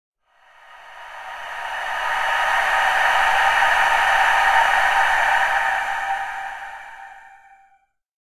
Air Gasps
Pad sound, breathy almost gaspy type sound, great for horror soundtracks.